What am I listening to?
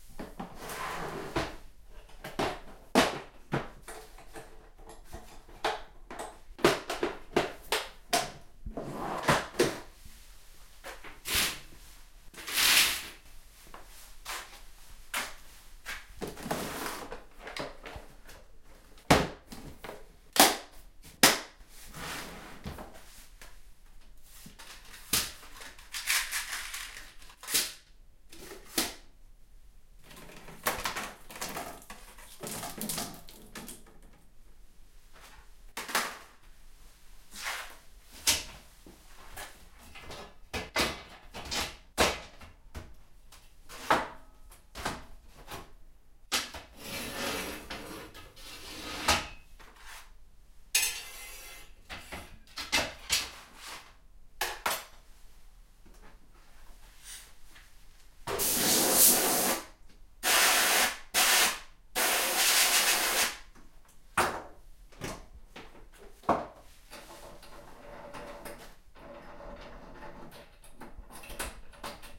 07-00 Atmosphere of workroom
atmosphere of workroom
atmosphere-of-workroom, CZ, Czech, Panska